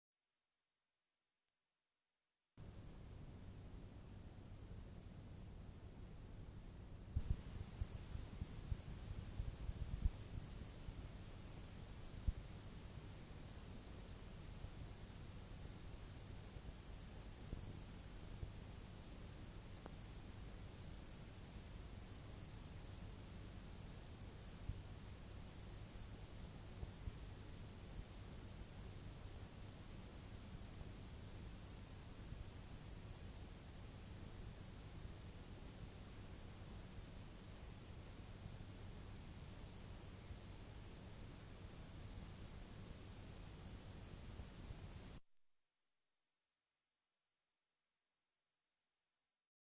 Recording tape hiss from a blank tape with Denon DN-720R tape recorder and player with Focusrite Scarlett 2i4.